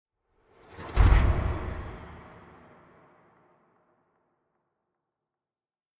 Horror Stinger 14 Cruel Fate
Horror Stinger Jump Scare Sound FX - created by layering various field recordings and foley sounds and processing them.
Sound Design for Horror
Jump-Scare, Stinger, horror-stinger, Horror, Cinematic, Boom, Fate-Strikes, Impact, Tension